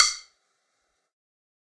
Sticks of God 024
drum, real, stick, god, drumkit